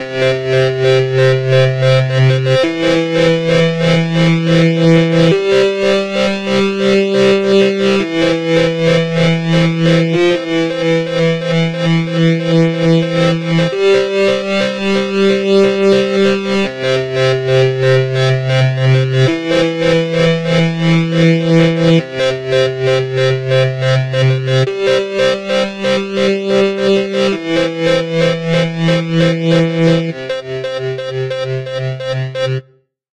Electric ORGAN IMPROV fast 01
I played a little improv session on a midi keyboard, and recorded it in FL studio.
studio, organ, improvised, FL, synth